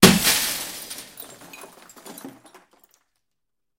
Windows being broken with vaitous objects. Also includes scratching.
breaking-glass, break, window, indoor